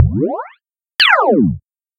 A machine or computer powering on and then off again.